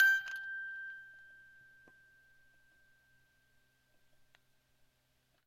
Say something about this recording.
11th In chromatic order.